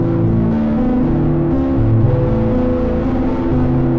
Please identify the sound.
Nord Lead 2 - 2nd Dump
resonant, ambient, nord, bass, glitch, dirty, tonal, blip, background, melody, idm, soundscape, rythm, backdrop, electro, bleep